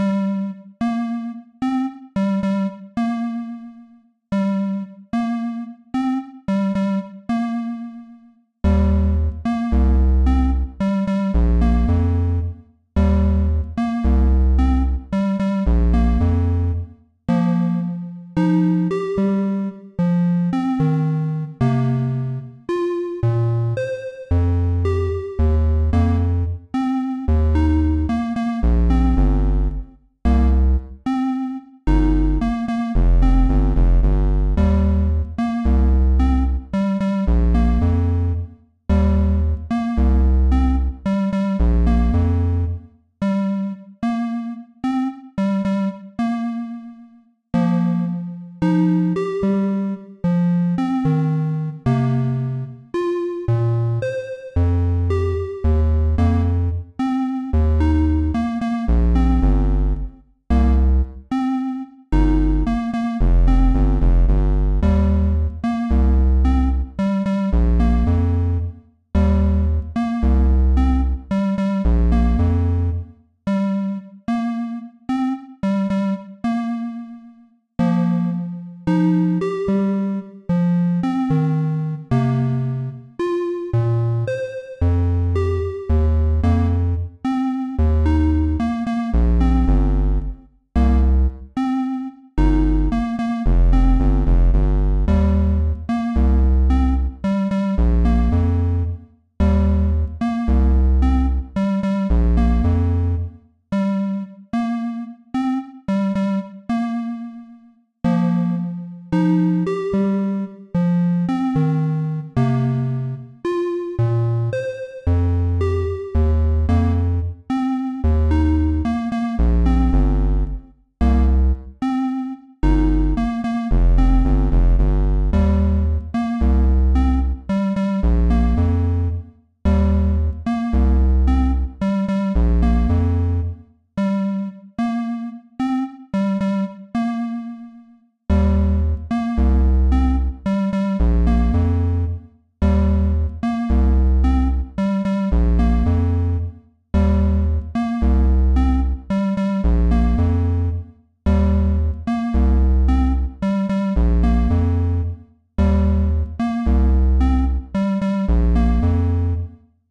Falling - 8 bit music loop
A short 8 bit music loop.
loop, music, 8bit, video-game, chiptune